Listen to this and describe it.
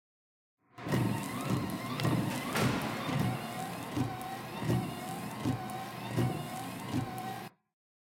MITSUBISHI IMIEV electric car FRONT WIPERS ext
electric car FRONT WIPERS
WIPERS, FRONT